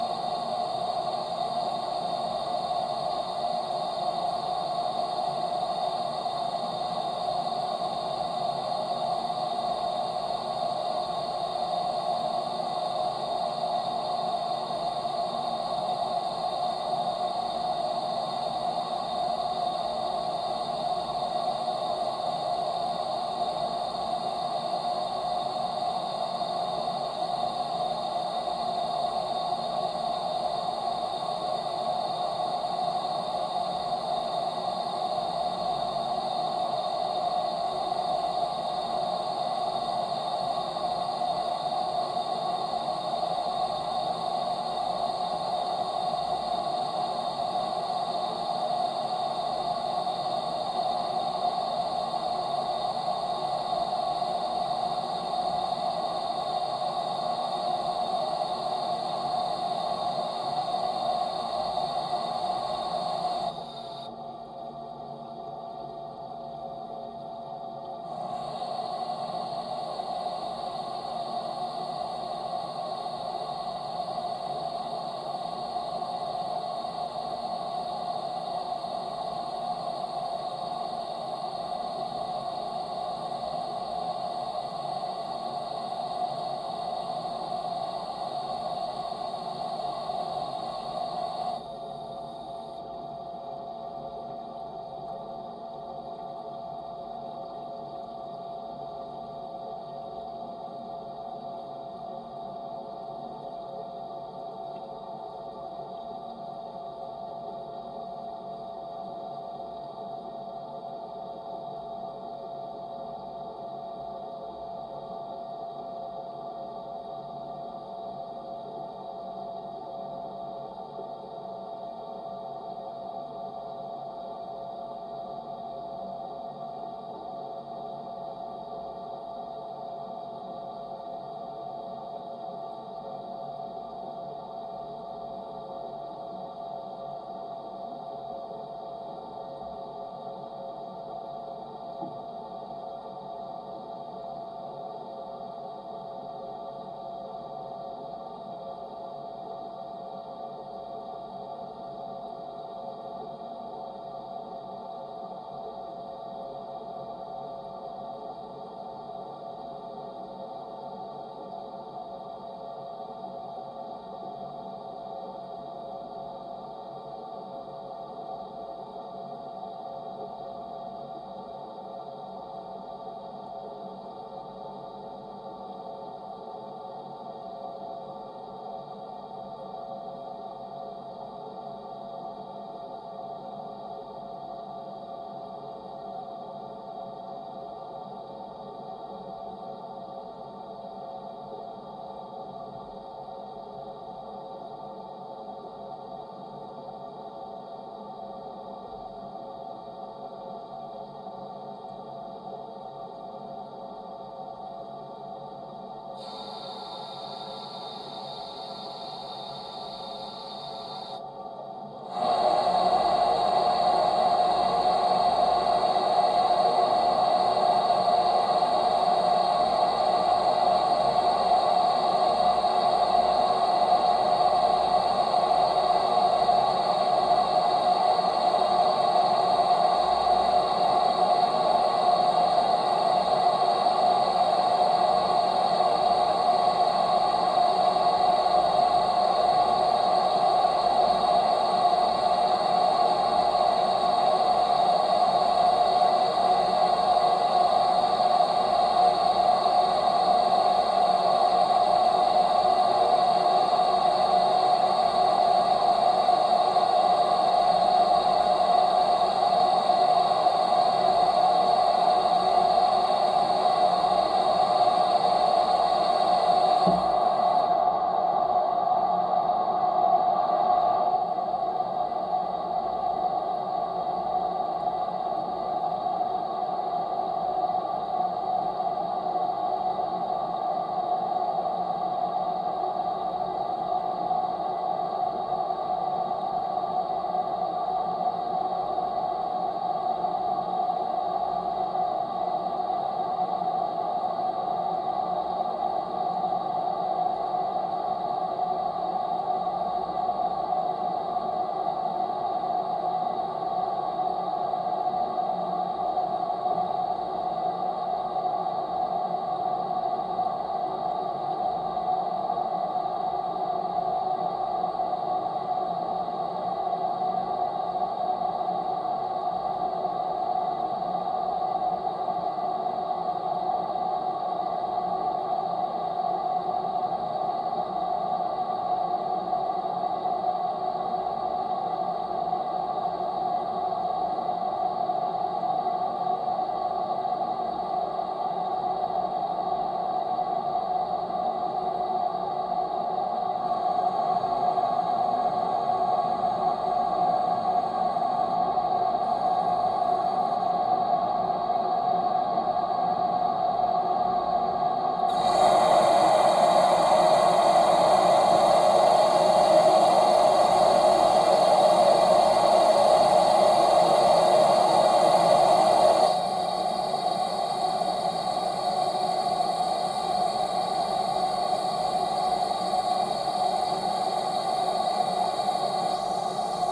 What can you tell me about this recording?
Water goes through radiator in a bathroom, also you can hear many connecting water activities. Recorded on Barcus Berry 4000 mic and Tascam DR-100 mkII recorder.